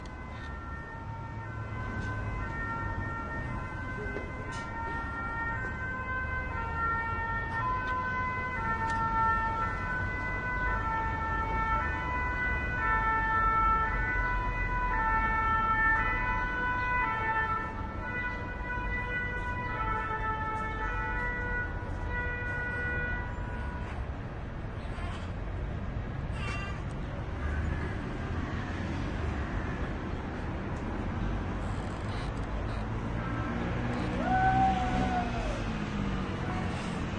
Siren Ambulance from 7th floor
Traffic, City, Siren, Ambulance, Ambiance